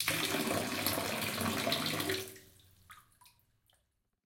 pouring water bath
Pouring water into the bathtub.
bath, stream, unedited, field-recording, liquid, water, pouring